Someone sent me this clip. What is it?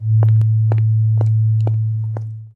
I recorded a heels sound, reduced the noise (thanks to noise reduction on Audacity), added a La (440Hz), fade in and fade out, used glare on this “La”. The beginning of the “La” has been amplified.
Ce son mélange continu varié (V) pour le La et itération complexe (X") pour le bruit des talons. Il s’agit d’un groupe nodal puisque deux sons complexes sont assemblés. Ce son est plutôt terne, grave, et est décroissant. Le grain est plutôt rugueux. Grâce au fondu d’ouverture, l’attaque n’est pas violente. Les hauteurs de ce son sont glissantes (variation serpentine). Le profil de masse est calibré.